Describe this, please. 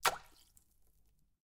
Variations about sounds of water.
SFX, water, drop, liquid